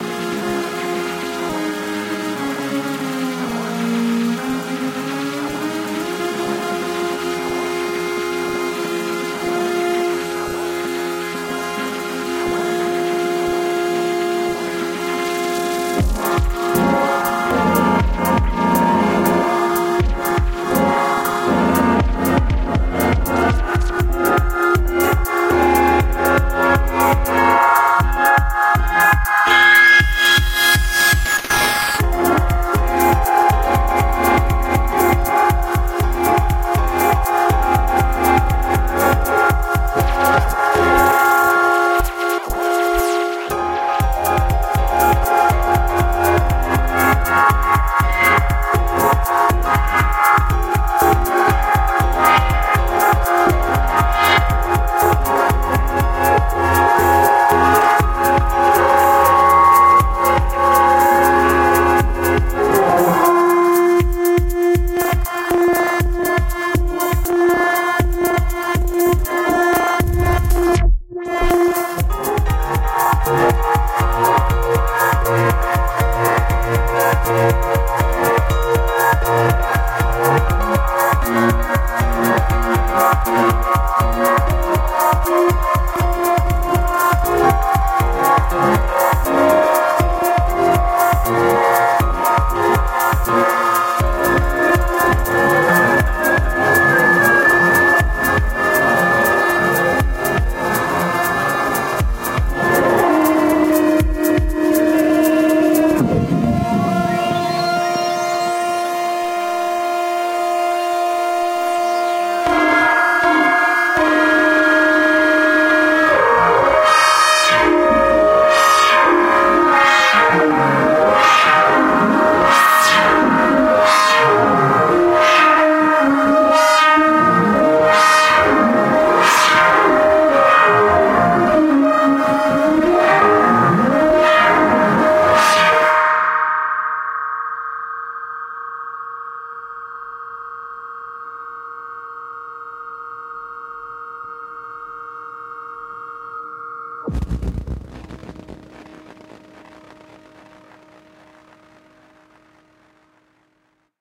OHC 499 - Experimental Synth
Granular Synth Experimental Beat Noise
Beat, Experimental, Granular, Noise, Synth